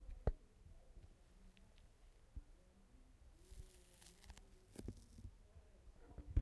HAND BOOK 01
hand off a book cover slowly. Zoom H1 recorder, unprocessed
hand-off, sticky, book-cover, down